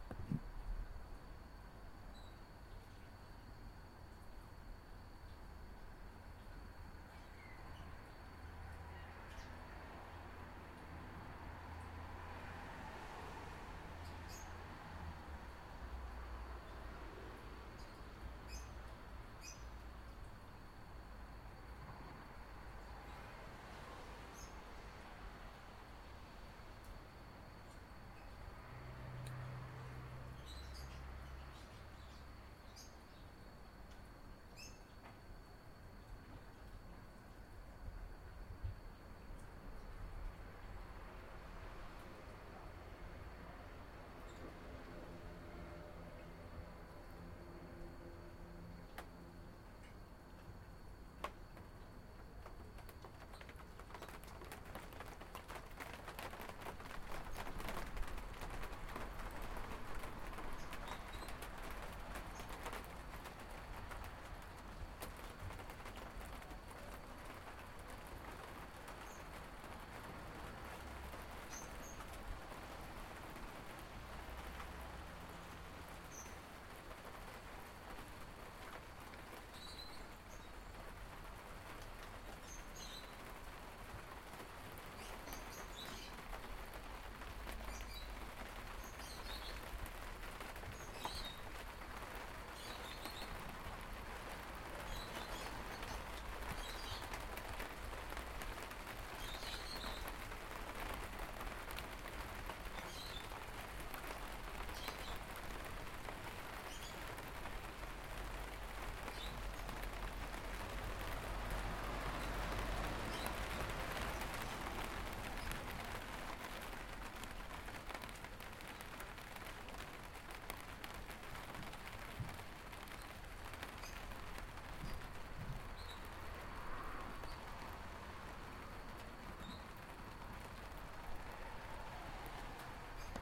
Silent Part of Town - Starting to Rain
I Recorded this piece in the hope to catch a bird sound I was hearing when I sat behind my desktop. It gave me this nostalgia feeling I had as an child when playing outside. When I started recording the bird was long gone, but then all of a sudden it started to rain. I was standing on my balcony under a plastic roof. Hope you Enjoy!